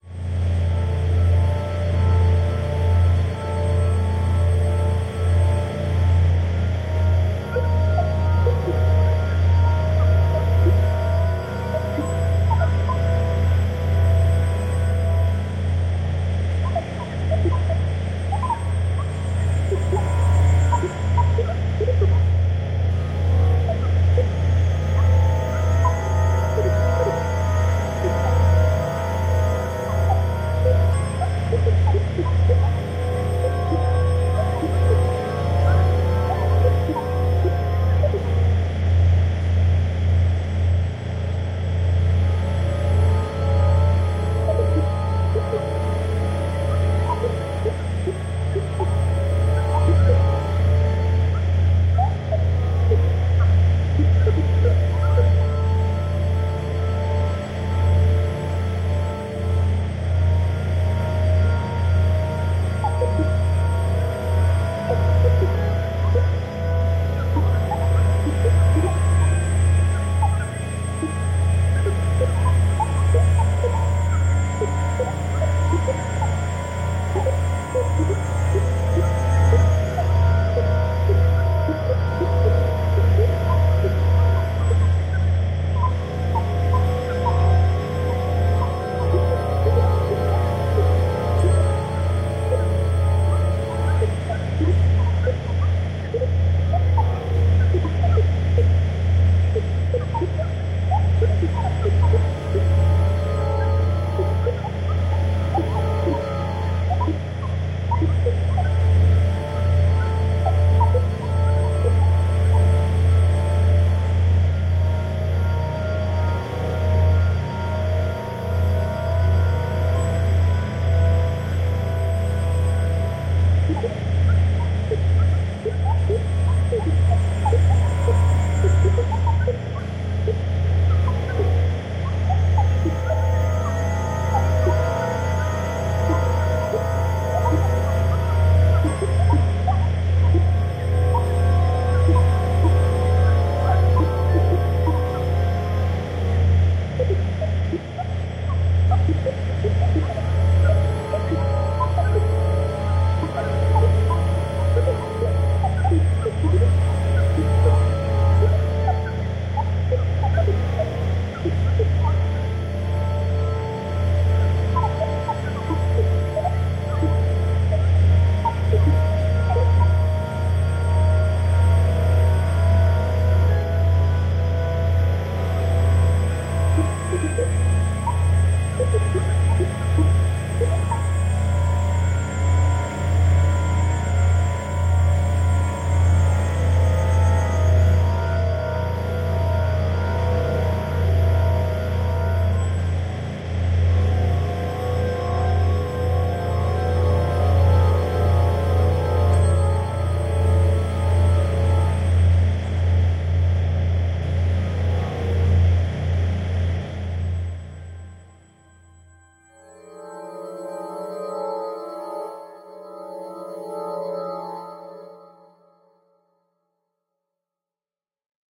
Unknown Ambience 2
(Description is updated in 22.05.2022)
This a second time making a ambience.
horror, ambience, fnaf